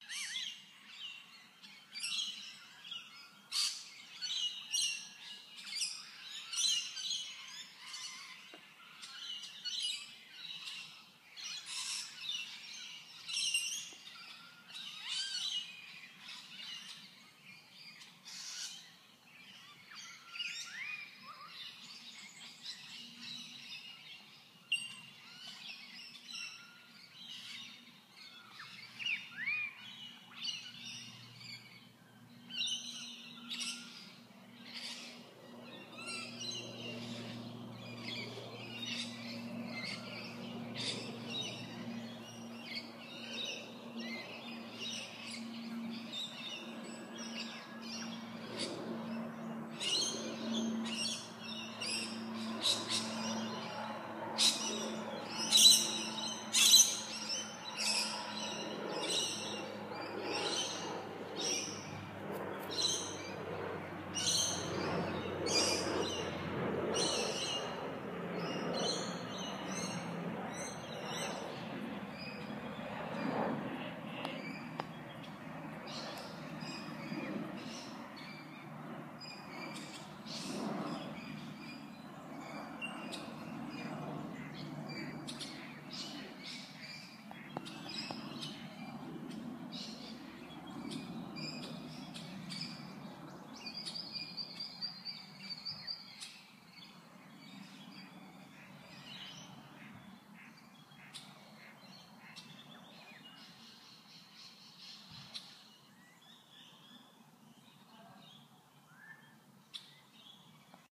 A sound of bird tweets and chirps.
Chirp, Tweet, Bird